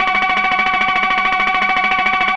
Digi Bird
sounds like a door ringing
digital, fx, harsh